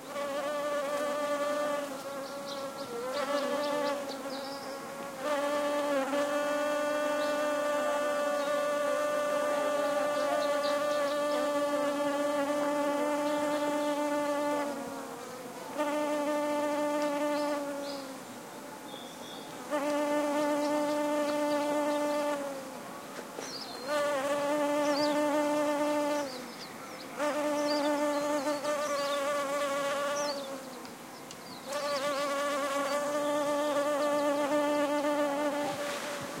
solitary bee (Anthophora) buzzing. PCM M10 internal mics